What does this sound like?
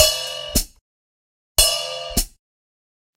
DM 76 HIHAT OPEN PATTERN 1
onedrop, roots